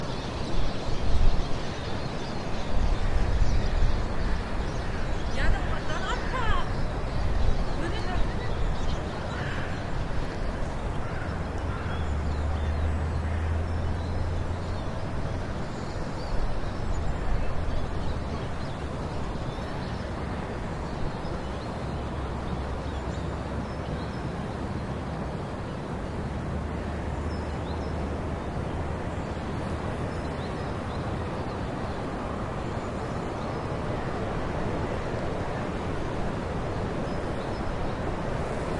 Just a short recording of a flock of birds, who were too far away to identify. Recorded in January 2011 in the Georgengarten Hanover/Germany with an Olympus LS-10 recorder. You can also hear a woman calling a little girl, who was to close to a pond.